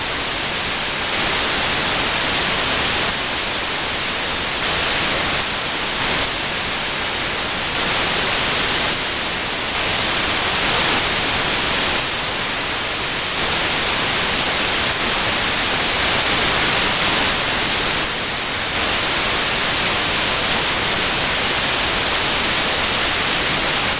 My theme is “elements & technology”, this is important because each of these sounds represents Humanities impact on the elements.
All four of my samples have been created on Ableton’s FM synth Operator.
There are zero signal processors used after the initial FM synthesis.
I thought that this is fitting since my theme is specifically about humanities effect on nature. Now for the specific description:
This is Dead Air. Humanities discovery of unorganized electromagnetic feilds (and our creation of more EM noise by using all sorts of technology) that are inside the WIND all around us.
Remember: This is completely from an FM synth.